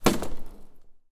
snd ImpactSmallWall02
metal impact of a wheelchair with wall, recorded with a TASCAM DR100